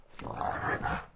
Snarls from the family dog as we play tug of war with her favorite toy. She has a very sinister, guttural growl that is betrayed by her playful intentions. In the background, you can hear the metal leash rattling on her neck.
play, snarl, animal, guttural